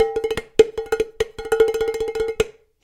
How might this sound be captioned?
Stomping & playing on various pots
0, egoless, natural, playing, pot, rhytm, sounds, stomps, various, vol